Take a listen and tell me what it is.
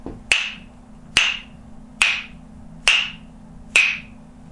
Clapstick Beat 1
Made with Aboriginal Clapsticks
aboriginal, australia, indigenous, percussion